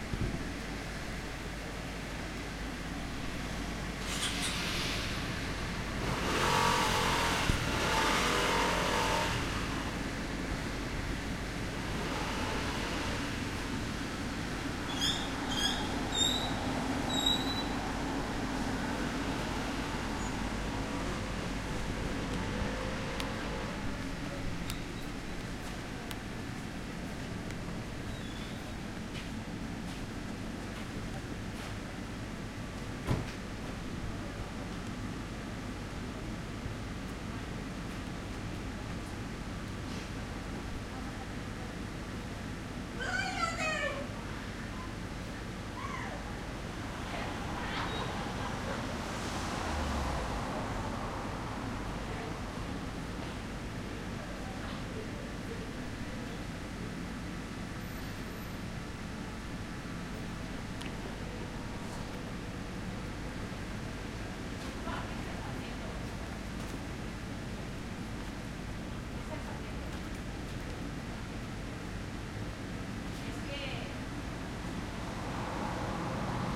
collab-20220510 Benzinera Cars Humans Nice Simple
Urban Ambience Recording in collab with La Guineueta High School, Barcelona, April-May 2022. Using a Zoom H-1 Recorder.
Simple, Humans, Nice